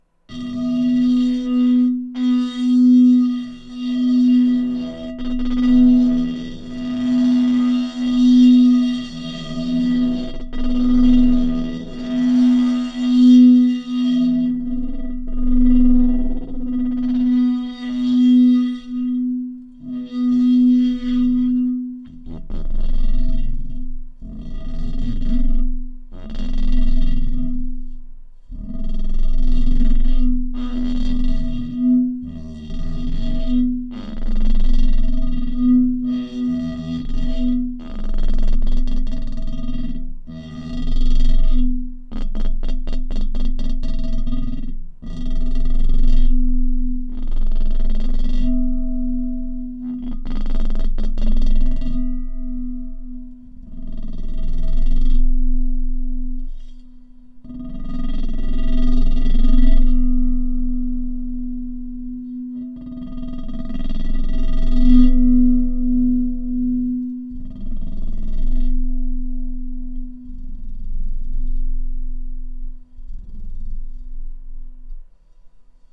Rugoso LA 4
bohemia glass glasses wine flute violin jangle tinkle clank cling clang clink chink ring
bohemia, chink, clang, clank, cling, clink, flute, glass, glasses, jangle, ring, tinkle, violin, wine